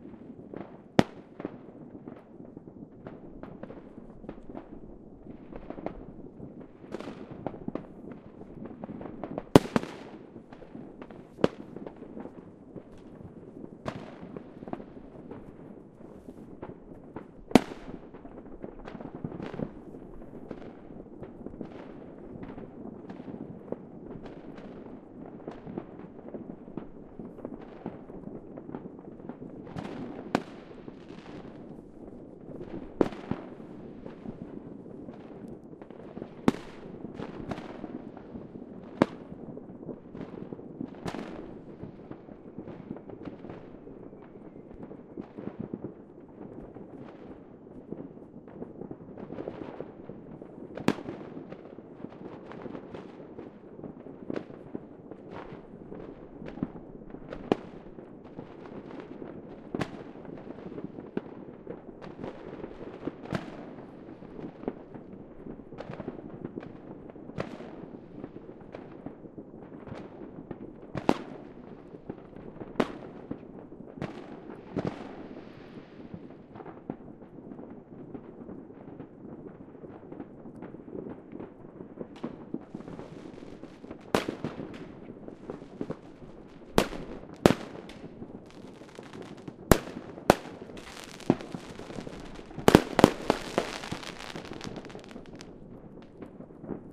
Close and distant fireworks at new years.
crackle, new-years, bang, pop, fireworks, rockets, close, stereo, distant